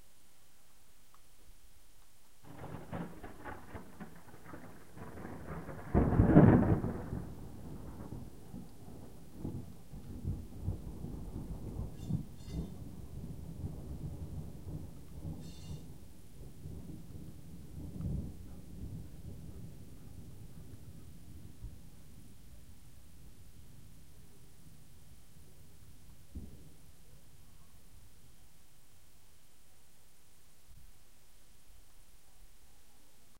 Huge thunder was recorded on 30th-31st of July, nighttime in a thunderstorm occured in Pécel,
Hungary.
player.
field-recording, rainstorm, thunder, thunderstorm, weather